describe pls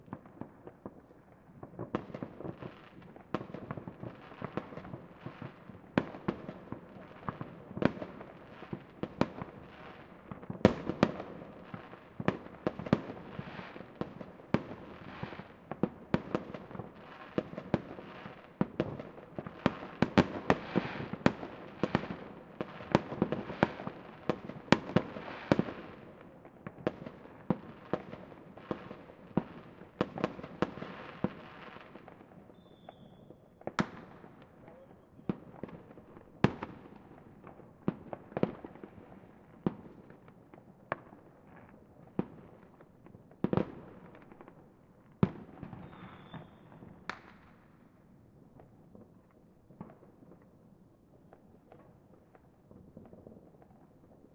New year fireworks
background, firework, new, year